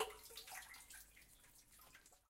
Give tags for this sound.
dropping-pebble; water; well

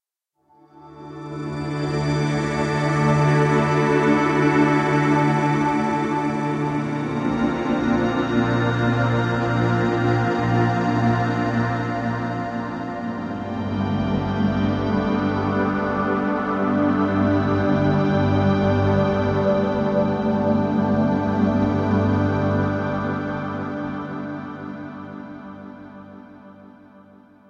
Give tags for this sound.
ambience; ambient; atmosphere; background; bridge; dark; deep; drive; drone; effect; electronic; emergency; energy; engine; future; futuristic; fx; hover; impulsion; machine; noise; pad; Room; rumble; sci-fi; sound-design; soundscape; space; spaceship; starship

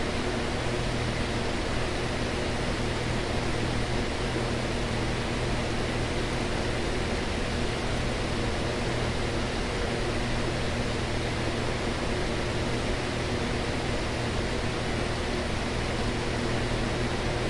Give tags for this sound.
fan; foley; loop; loopable

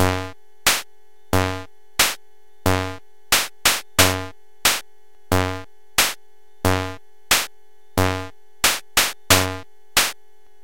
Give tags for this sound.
90; 90bpm; beat; cheap; drums; electronic; keyboard; loop; machine; march; slow; toy

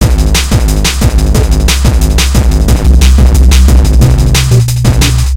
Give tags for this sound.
bass; drum; 180bpm; hardcore; loop

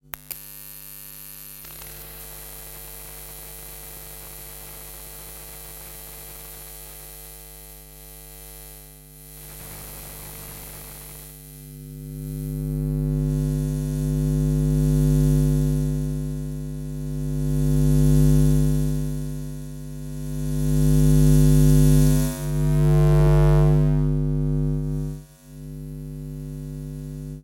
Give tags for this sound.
amp; arc; ark; audio; buzz; design; effects; electric; electrical; electricity; fuse; glitches; ninja; plug; shock; socket; sound; spark; sparkling; sparks; volt; voltage; watt; zap; zapping

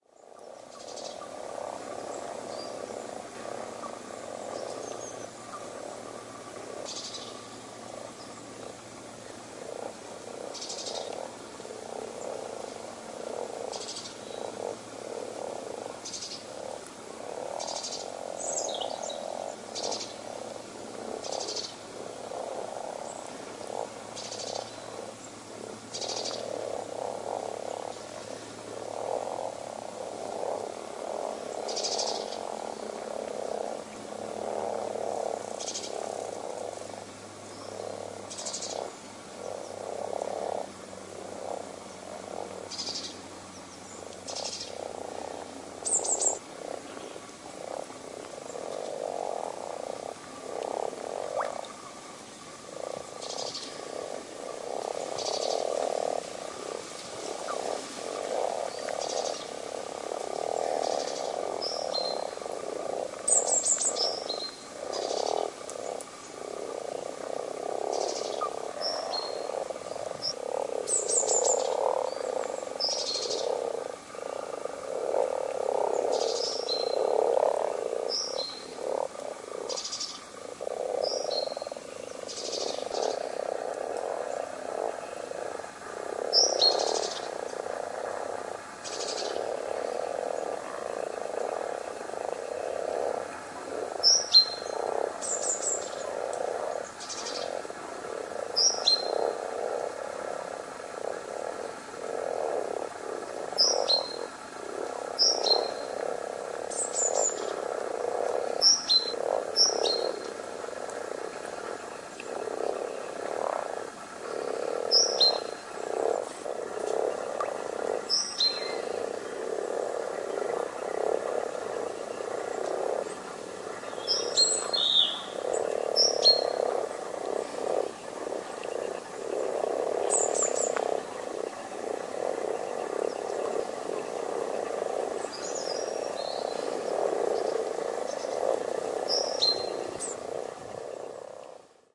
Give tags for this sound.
birdsong; pond; frog; field-recording; nature; spring

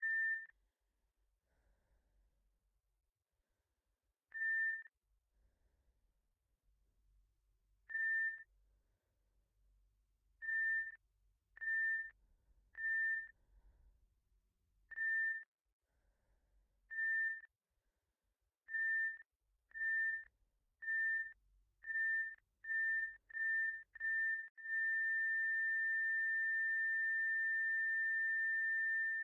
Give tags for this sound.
electrocardiogram; heart; breath

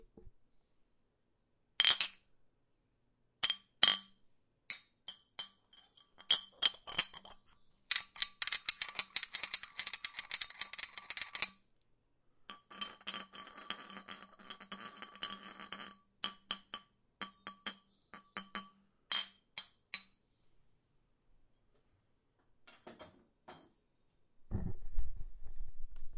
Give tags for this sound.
bottles; klink

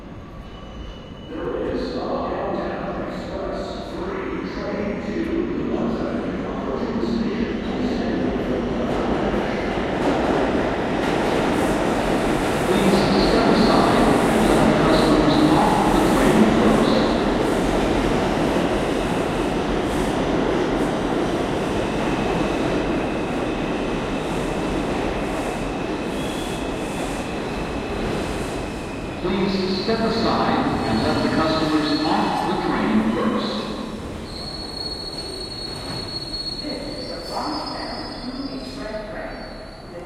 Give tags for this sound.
1-train; ambiance; announcement; arrival; departing; departure; field-recording; IRT; metro; MTA; New-York-City; NYC; platform; railway; railway-station; Spanish-announcement; station; subway; subway-announcement; subway-platform; train; transit; underground; west-side

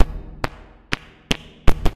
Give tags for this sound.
abstract; electro; click; effect; noise; glitch; static; processed; synth; contemporary; digital

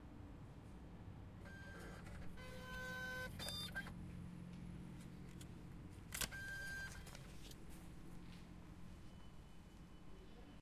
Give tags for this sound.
travel; subway; train; light-rail; tube; metro; travelling; ticket; ticket-machine; tickets; station; underground; train-station

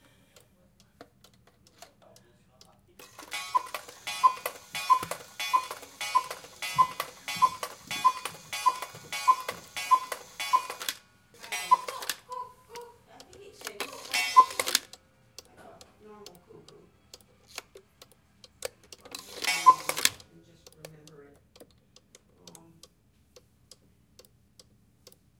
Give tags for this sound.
chime; clock; cuckoo

